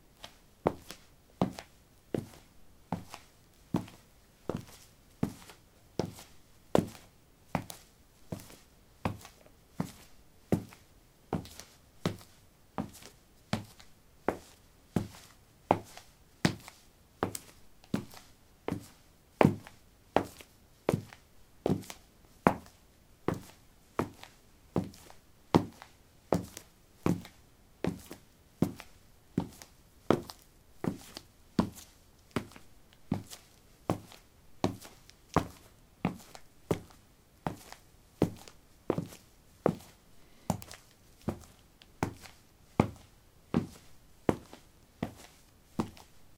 concrete 08a womanshoes walk
Walking on concrete: woman's shoes. Recorded with a ZOOM H2 in a basement of a house, normalized with Audacity.
steps, footsteps, concrete, walking, walk